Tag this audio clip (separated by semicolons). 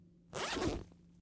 studio; 3am; audio